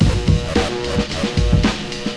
A loop I made with tureg